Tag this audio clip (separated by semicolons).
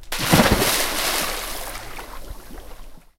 jump; jumping; pool; splash; splashing; splosh; swimming